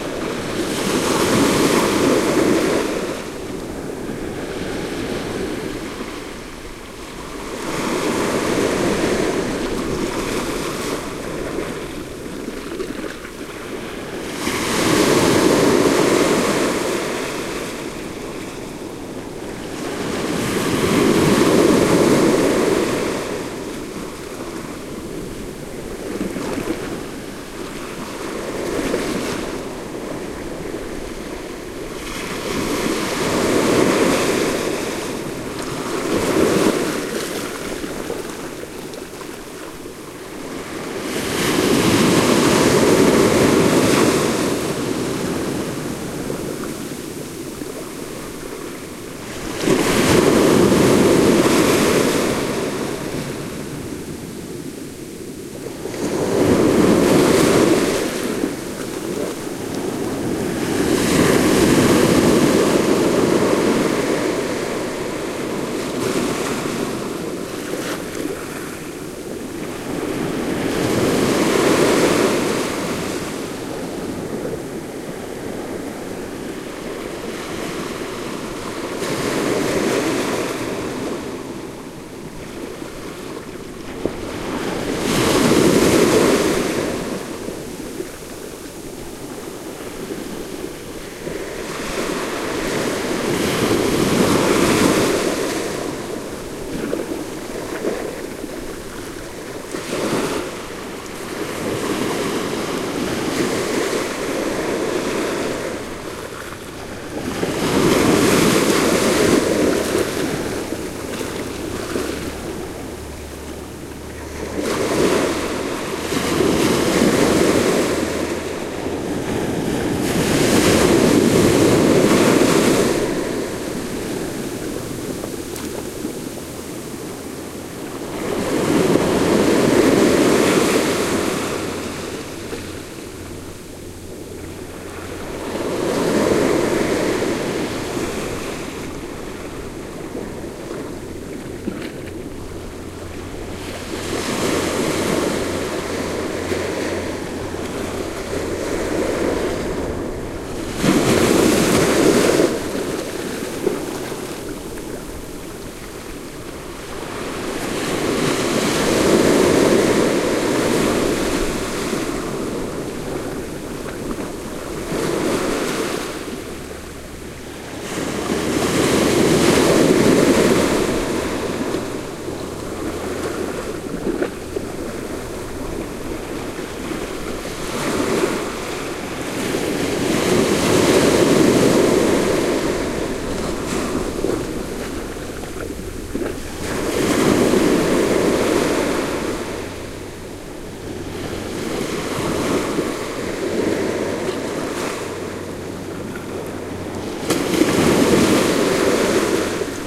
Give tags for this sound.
spain; waves; coast; beach; ocean; water; field-recording

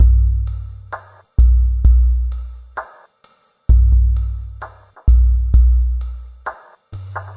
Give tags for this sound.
dance; bpm; mic-noise; electro; techno; loop; 130; beat; drum-loop; electronic

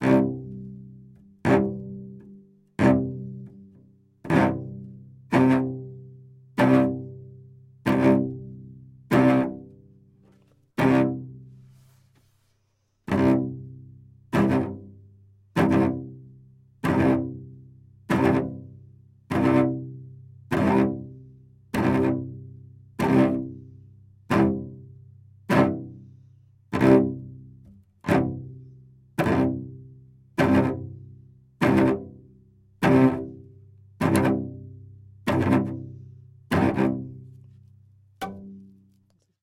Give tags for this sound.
bow; Cello; concrete; design; film; imitation; instrument; mono; motor; object; objet-sonore; ponticello; quartet; raw; score; scrape; sound; string; sul

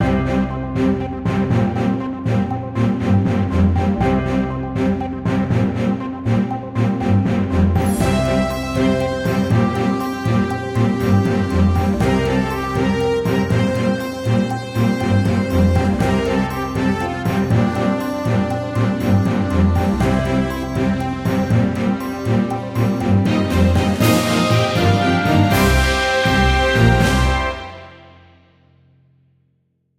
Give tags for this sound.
loop; jingle; news; tv; signature; outro; intro; radio

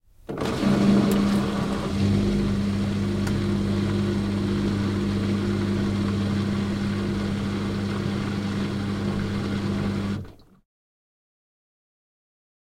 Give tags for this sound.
bathroom; cleaner; cleaning; cz; machine; panska; wash; washer; washing; water

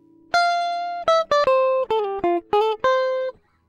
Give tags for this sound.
acid; apstract; funk; fusion; groovie; guitar; jazz; jazzy; licks; lines; pattern

